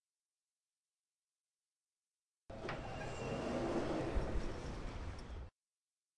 sound of mechanic doors opening. Recorded with a Zoom H2. Recorded at Tallers on Campus Upf.
door, campus-upf, sliding, open, UPF-CS13